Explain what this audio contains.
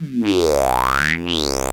These samples come from a Gakken SX-150, a small analogue synthesizer kit that was released in Japan 2008 as part of the Gakken hobby magazine series. The synth became very popular also outside of Japan, mainly because it's a low-cost analogue synth with a great sound that offers lots of possibilities for circuit benders.